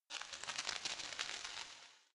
Cigarette inhale
Sound of inhaling on a cigarette. Recorded with Olympus VN-4100PC digital voice recorder. I reduced the noise and amplified the sound.
smoking, field-recording, smoke, cigarette